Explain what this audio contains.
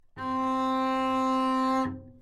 Double Bass - C4
Part of the Good-sounds dataset of monophonic instrumental sounds.
instrument::double bass
note::C
octave::4
midi note::60
good-sounds-id::8625
good-sounds, neumann-U87, multisample, C4, double-bass, single-note